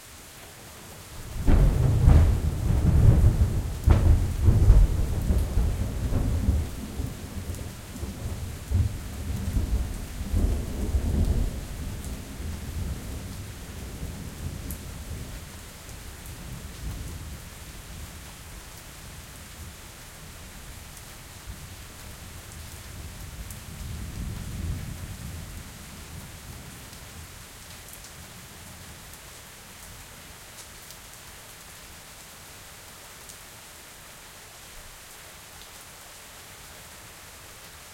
thunder, medium rain
7dB boost, no processing
zoom h6, xy capsule